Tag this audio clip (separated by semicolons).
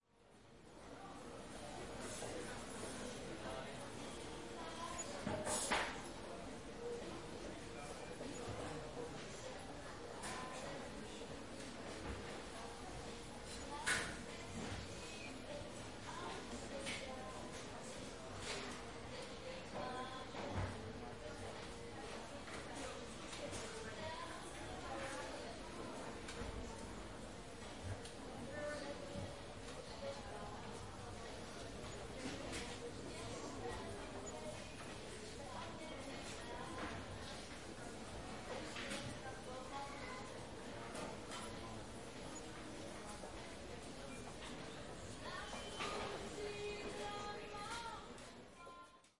ambience; buy; buying; cash; market; people; shop; shopping; store; supermarket